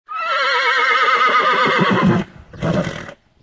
Mare in heat